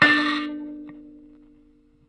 96kElectricKalimba - K3buzz
Tones from a small electric kalimba (thumb-piano) played with healthy distortion through a miniature amplifier.